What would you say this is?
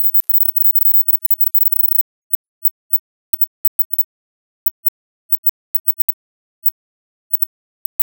text file opened as raw data
data, raw